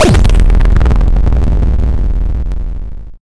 mag explosion
8, bit, explosion, game, SFX